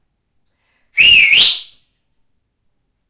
A whistle to call an animal or a person.